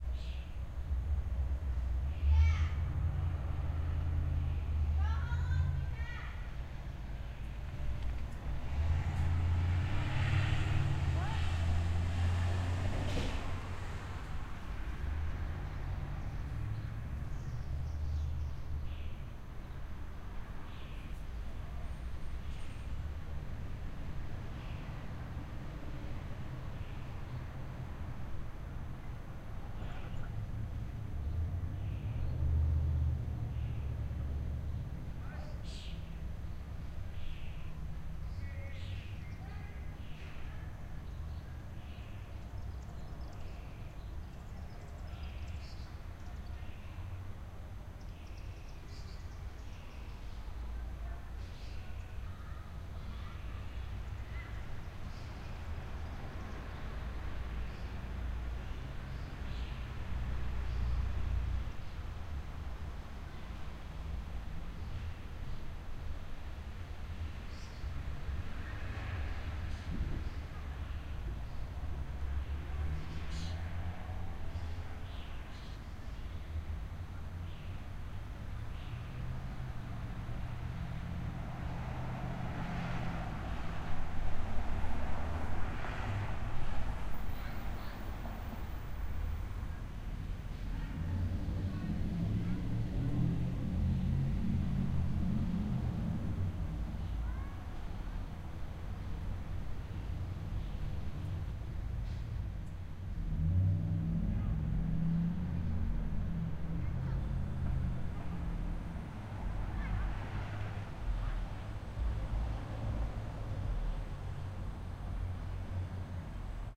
5 22 06 Ludington Mi Usa 5pm
Rode NT4 placed in a small stand pointed perpendicular and center directly out my living room window during the daytime. Some birds and some humans, along with the noise of cars in a small town. NT4 goes directly to an Maudio Delta1010LT. Raw file with trimmed ends. Ludington, Michigan, us